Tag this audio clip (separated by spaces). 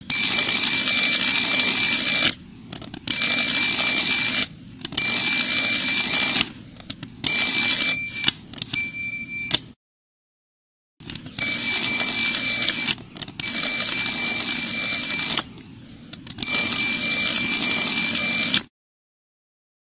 pneumatic machine robot hydraulic mech machinery